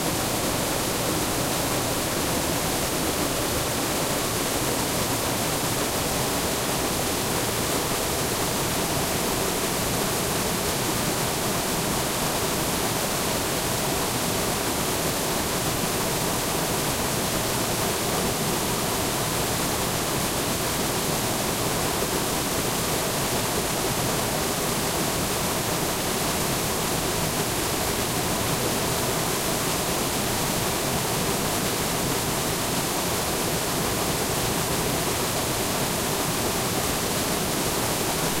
The strong sound of a waterfall only about 10 feet away. It is looping and has been edited to be seamless.
flow, river, stream, water, waterfall, white-noise